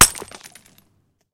Throwing a rejected piece of handmade pottery on a railway track.
smashed, broken, shatter